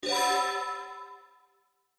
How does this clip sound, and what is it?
Magic spell buff bell sparkle reverb
bell, buff, Magic, reverb, sparkle, spell